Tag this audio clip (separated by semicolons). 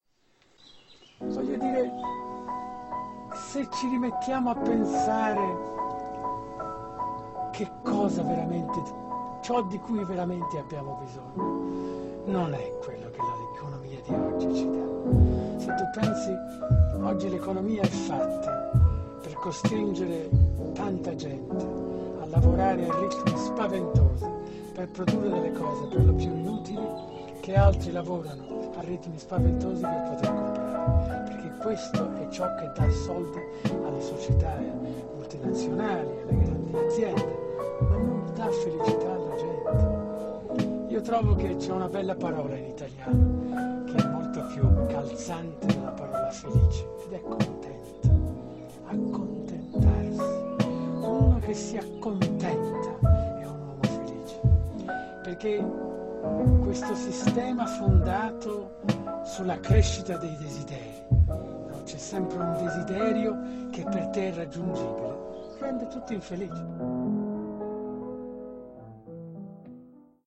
hop; jazz; lo-fi; lofi